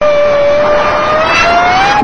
A woman screaming.
666moviescreams, female, pain, scream, woman